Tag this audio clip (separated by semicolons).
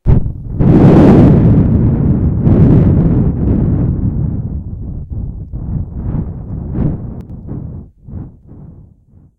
Lightning; Storm; Thunder; Thunderstorm; Weather